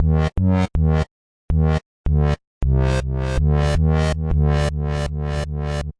160 Fub Dub synth 01
bertilled massive synths